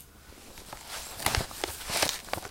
Page from a book being turned
book, paper